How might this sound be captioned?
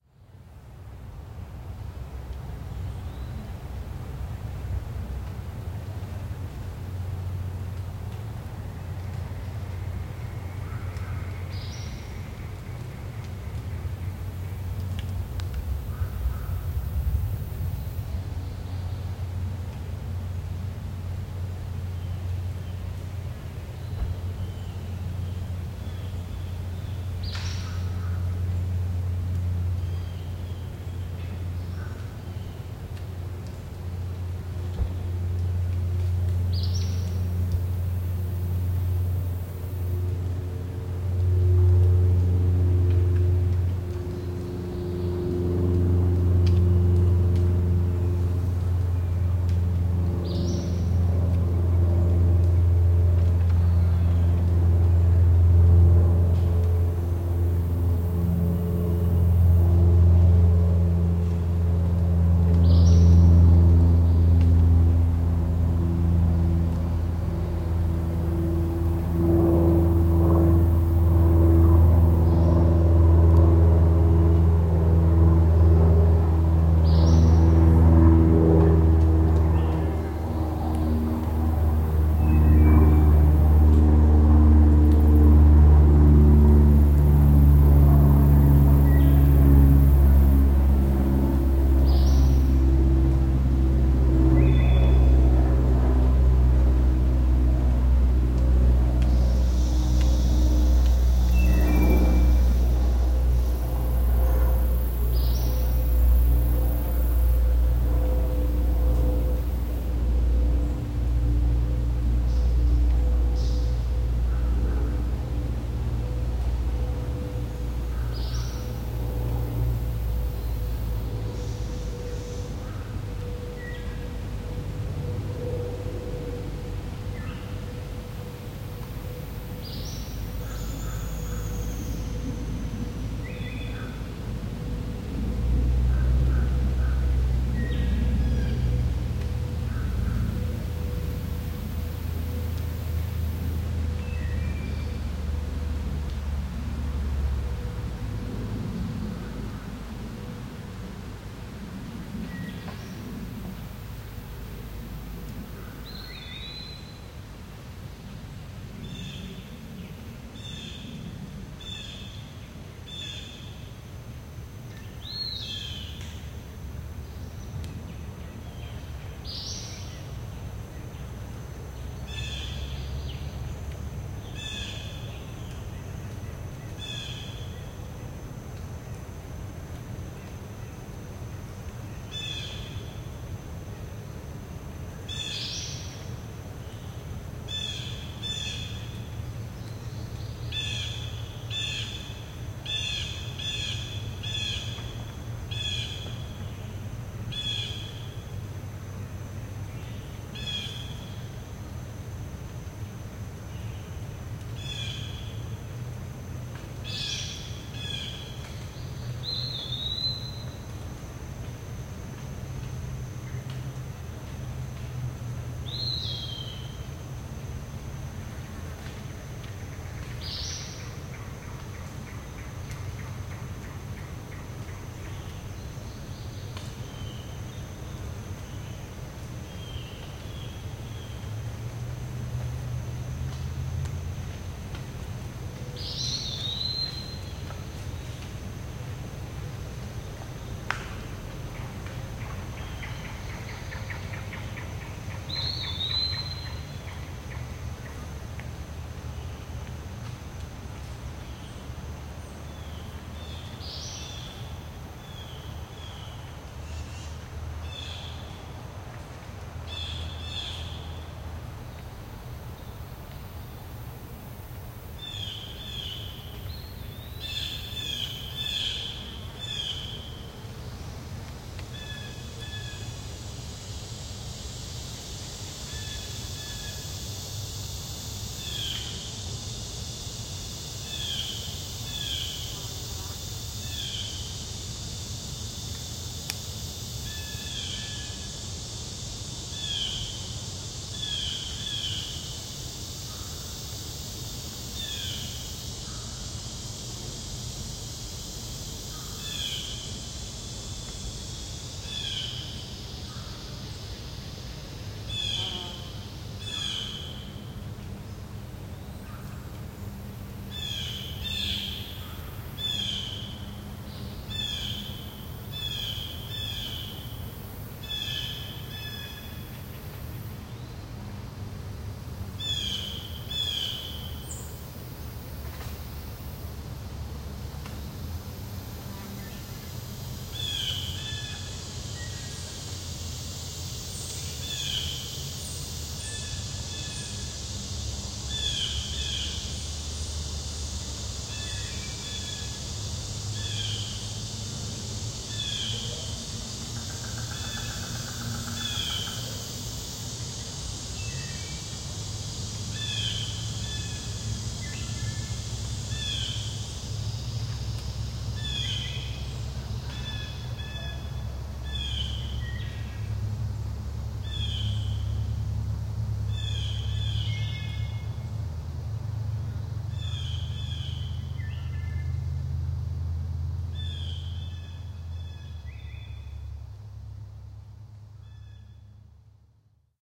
Lazy Summer 48hz drowsy plane woods Blue Jays MKH8020 lkmbor JULY 18 2022
A drowsy, warm lazy hot day in mid-summer in the forest of the Midwestern USA. As is the case around mid-July, the birds are not singing as much. Perhaps they are just exhausted from raising a family and wilting from the heat. The insect chorus ebbs and flows, like the ocean waves creeping up on the beach, and retreating back. Except, unlike the ocean which never stops moving, the insects will eventually stop singing and hibernate for the winter.
I have always loved the sound of propeller-driven planes and their slow, molasses-like sound. That sound has always induced a melancholy touch to the recordings. To me, this drowsing plane symbolizes change -- even though the birds and plants are still in full growth, the end is near...in about four more weeks schools will resume, the emphasis for many will turn inward. Leaving the woods to deal with the transition. The droning plane, like ocean waves, symbolize change...in several months there woods will be drastically changed.
Droning, field-recording, Forest, Nostalgic, Summer